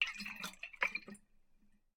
Water in a metalic drinkbottle being shaken.
bottle, drink, drinkbottle, liquid, shaken, slosh, sloshing, water